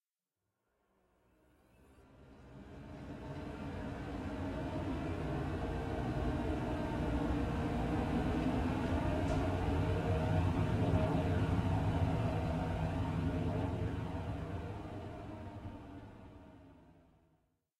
Plane Drone
The drone of a plane above. Generated from the startup fans of a desktop computer.
Modifications to the original sound include phaser, reverb, and a low-end boosted EQ.
generated, plane, drone